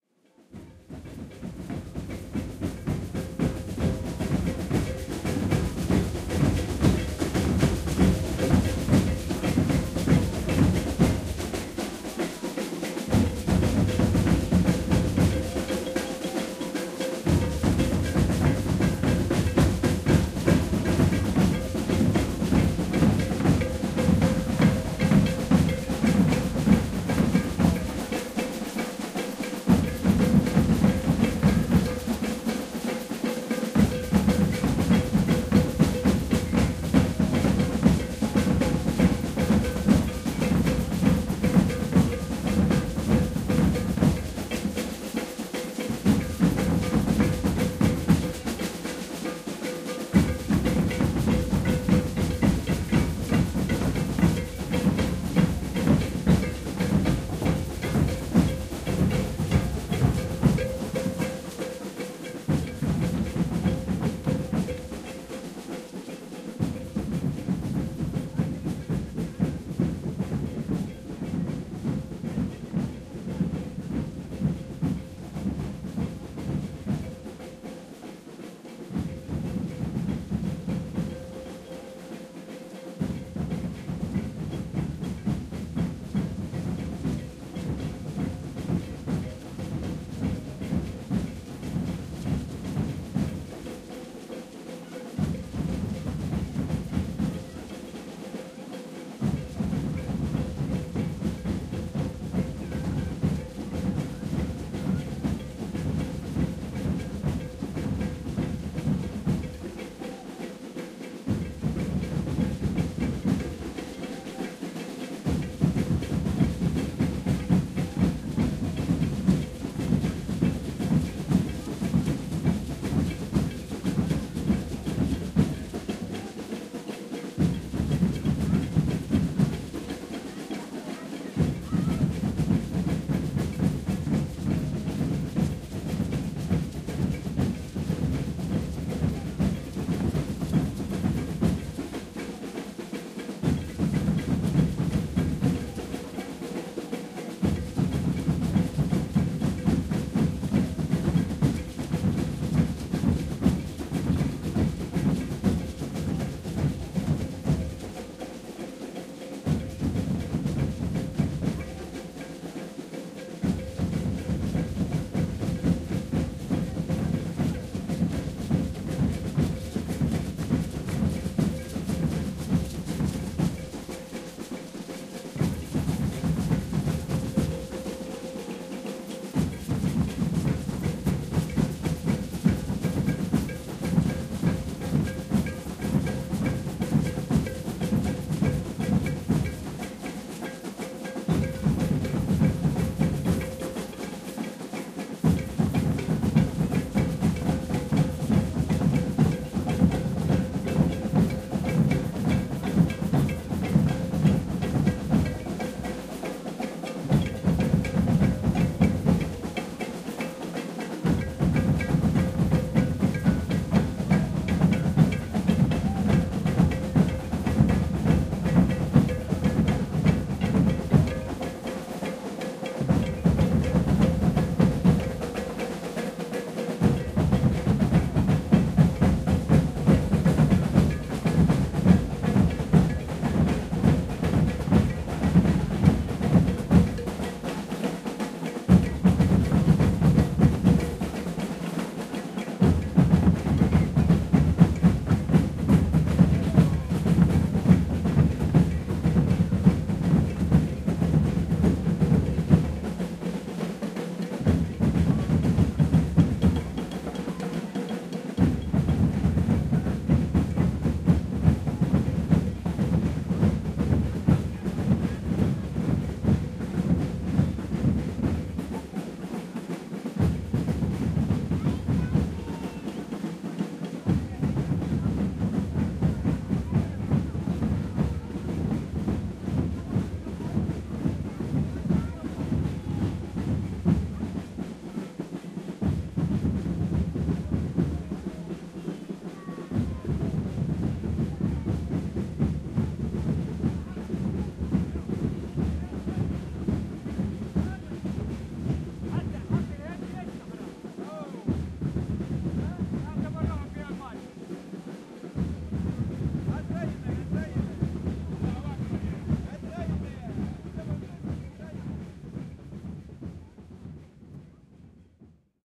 Street parade of a Maracatú group of about 100 musicians, singing, dancing and drumming during the Bad Wildungen(Germany)Samba Festival in the ending summer of 2013. The rhythm is originated from the Cities of Recife and Olinda, state of Pernambuco in the north-eastern region of Brazil. It was developed by african slaves as a mixture of portuguese court life style, shown by wearing upper class clothing of the 18th century, dancing and playing the drums in an african style. Zoom H4n